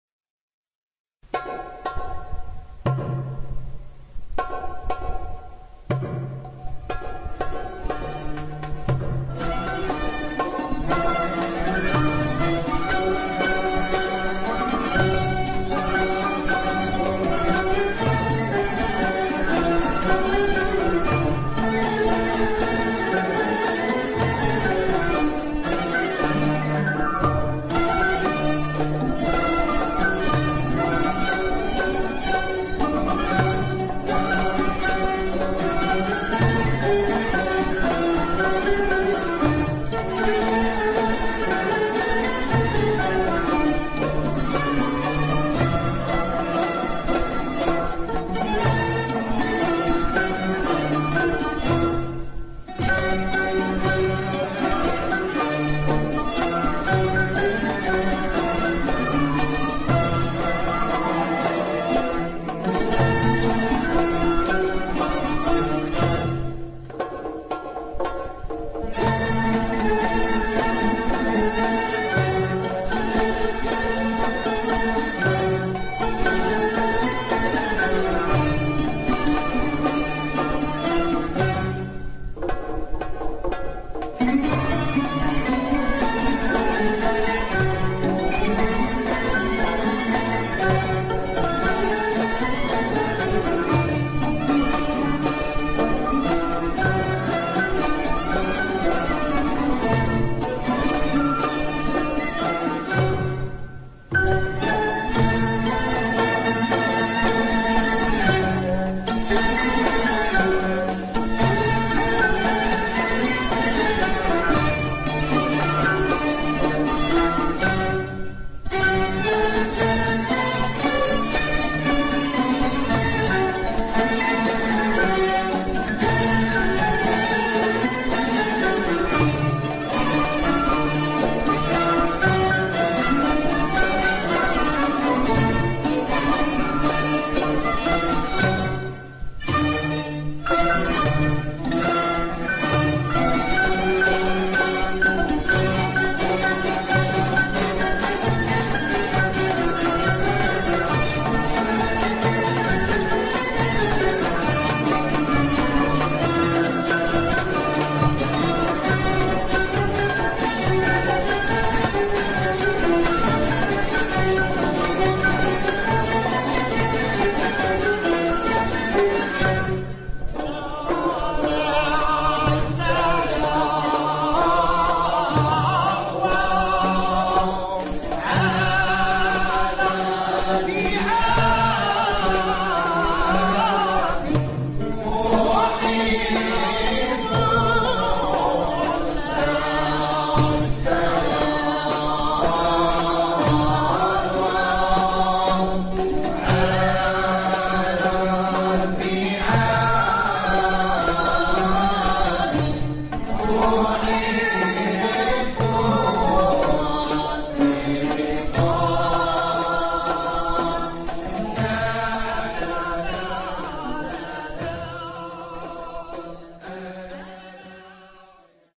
Quddam Mwessa3 Rhythm+San'a
Quddam muwassa' (light) rhythm with ornaments, applied to the San'a "Kul-lu sa'bin" of the mizan Quddám of the nawba Gharíbat al-Husayn